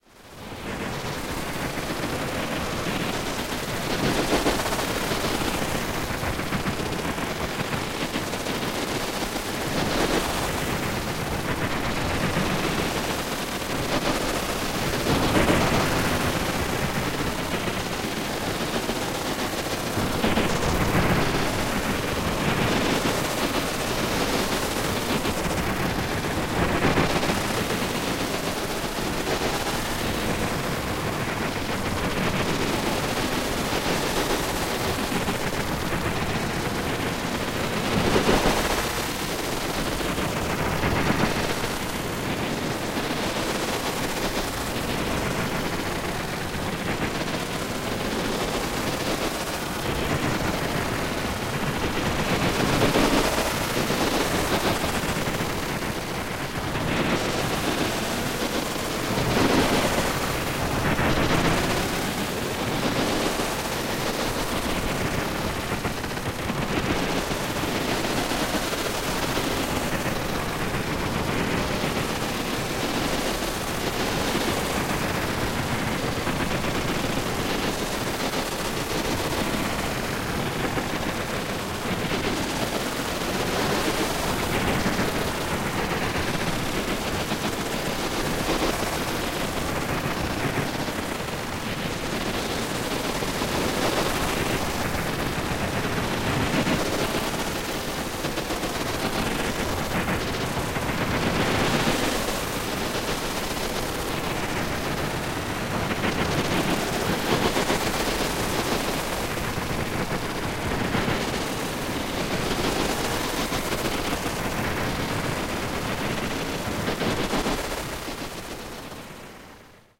Noise Garden 26
1.This sample is part of the "Noise Garden" sample pack. 2 minutes of pure ambient droning noisescape. Weird random noise effects.
electronic, soundscape